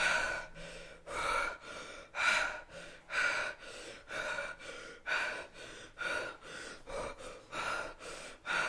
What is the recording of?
Panting Male
Panting, breath, breathe, breathing, english, high, high-quality, human, male, oxygen, pant, quality, request, speech, talk, vocal, voice